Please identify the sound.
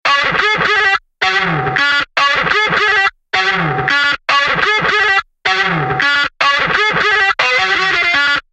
Drunk Guitar